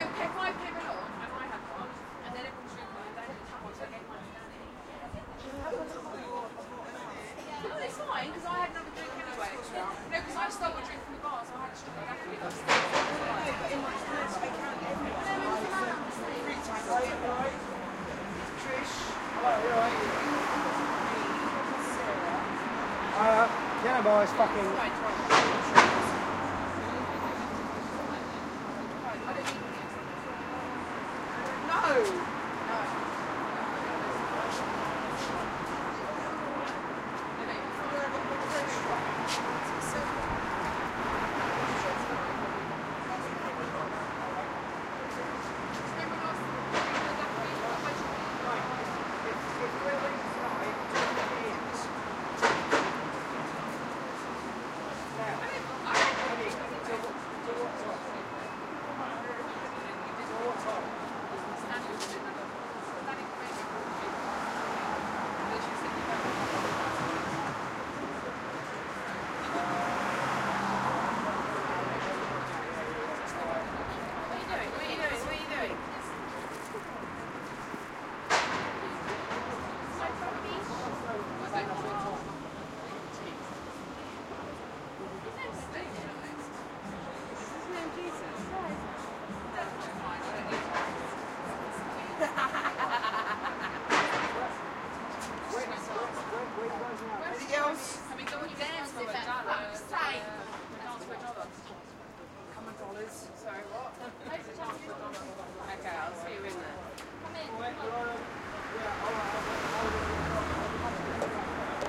The entrance / smoking area of a British nightclub midway through the night on a quiet Friday. The club overlooks a main road.